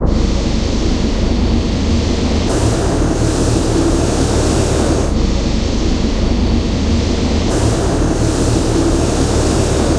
a pad loop made from a street sweeper sound
source file: